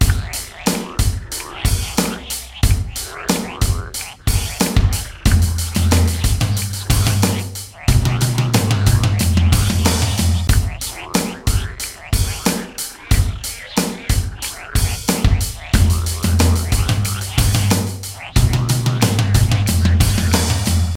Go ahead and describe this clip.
Frog Jam 92bpm
I created these perfect loops using my Yamaha PSR463 Synthesizer, my ZoomR8 portable Studio, and Audacity.I created these perfect loops using my Yamaha PSR463 Synthesizer, my ZoomR8 portable Studio, and Audacity.I created these perfect loops using my Yamaha PSR463 Synthesizer, my ZoomR8 portable Studio, and Audacity.
bass, beats, bpm, drums, dubstep, groove, guitar, Loop, music, pop, rock, synthesizer